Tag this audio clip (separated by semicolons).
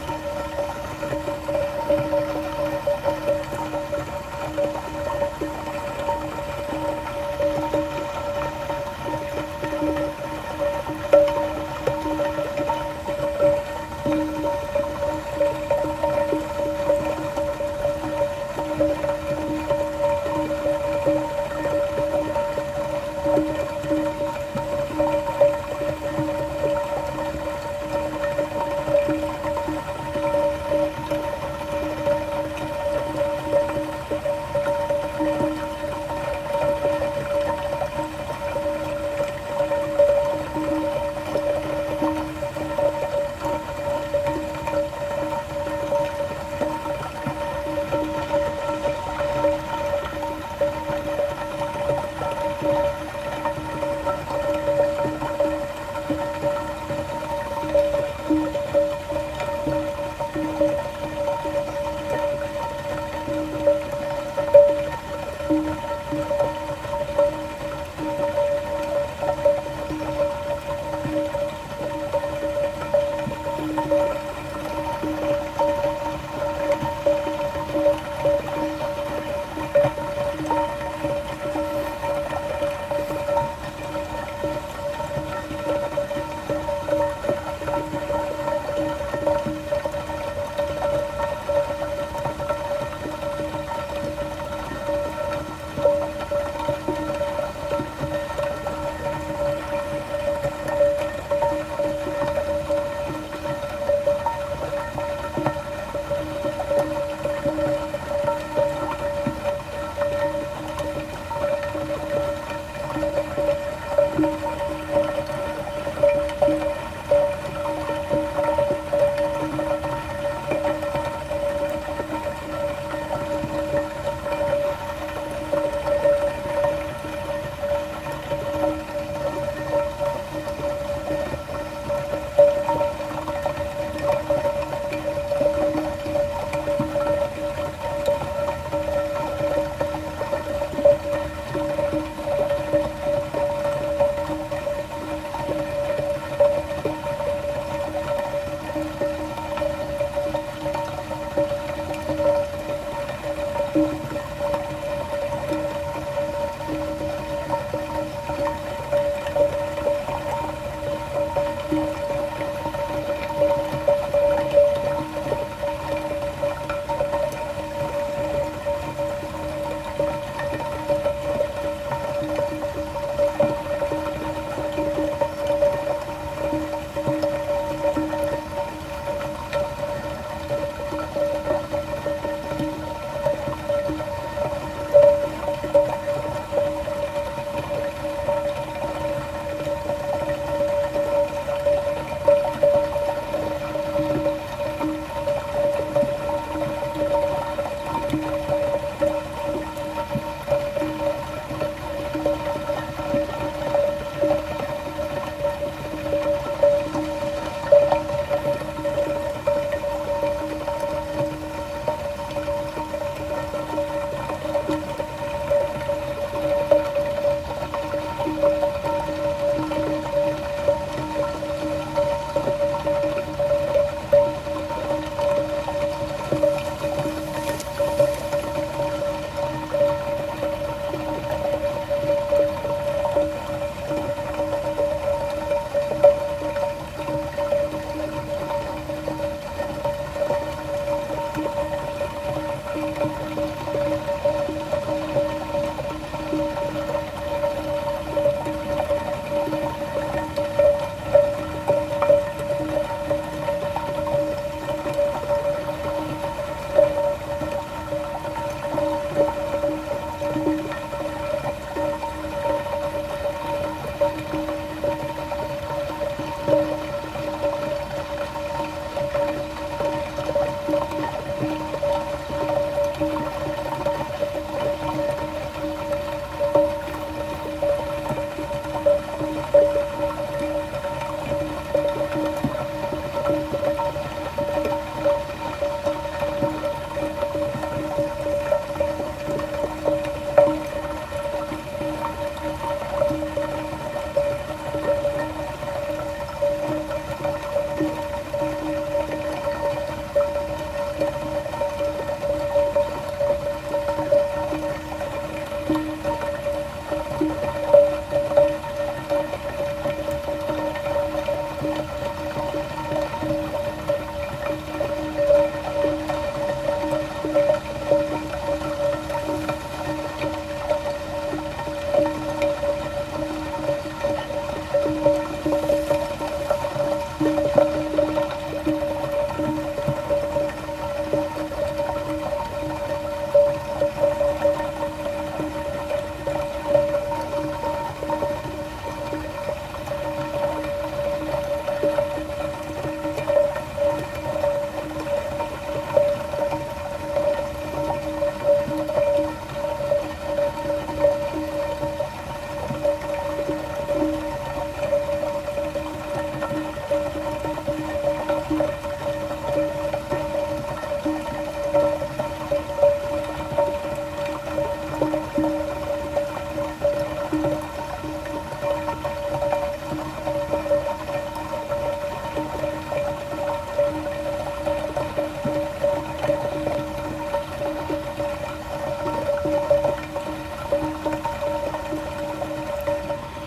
soundscape; water; ambiance; ambient; ambience; field-recording; tone; atmosphere; pipe; chill